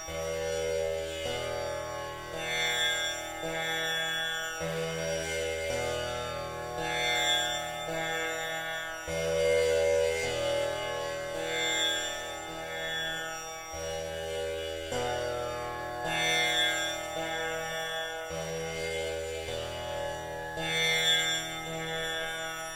Drone sound of indian Flat Tanpura in E with 5th and 8th. Recorded by Audiotechnica clip microphone.